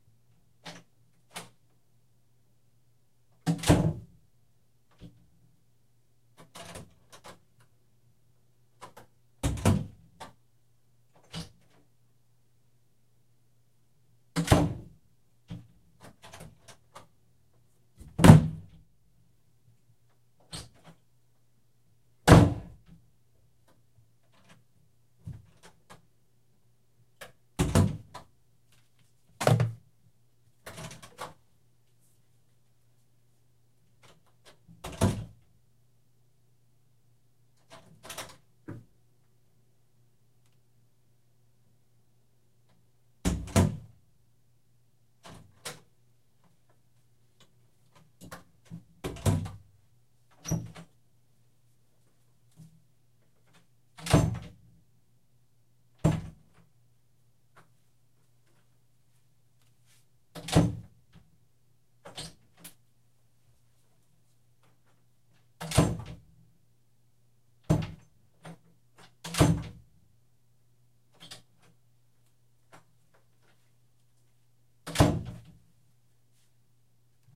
Interior door opening and closing multiple times, two slams included. Mono recording.
interior, slam, opening, foley, mono, door, doors, open, close, closing